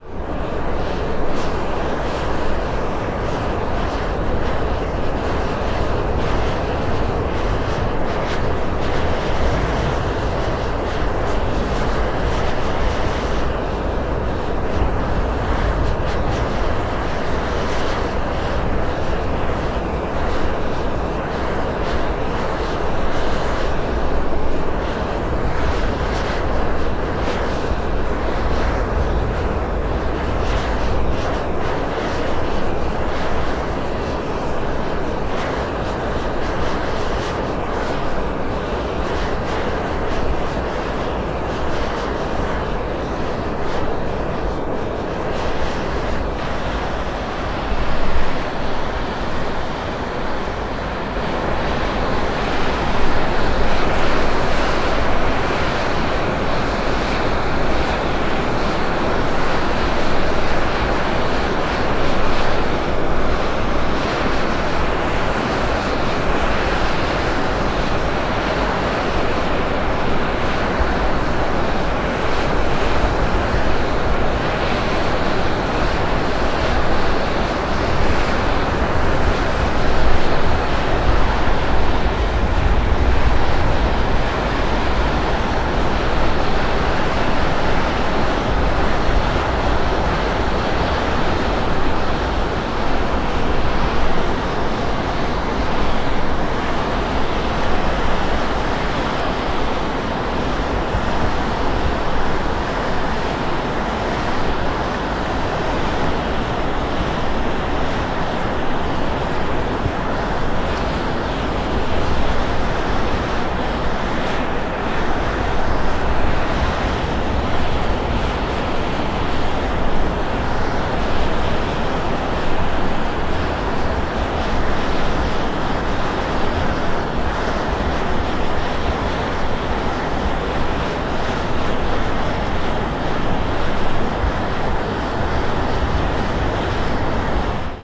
Sound of sulphur gases leaking out on summit of a volcano
Recorded on Vulcano, Italy